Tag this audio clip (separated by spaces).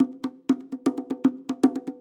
bongo drum percussion